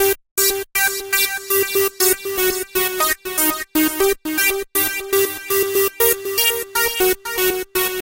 Synthie loop constructed with Korg MS 2000